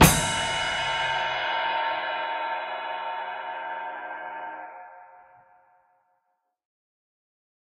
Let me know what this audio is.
This Hit was recorded by myself with my mobilephone in New York.